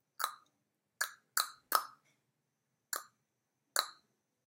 mouth sound
clap, pop, transition, mouth